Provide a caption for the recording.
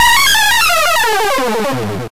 Power-down
Can be used when the ball enters an outlane in a pinball game. Created using BFXR
game, pinball, sfx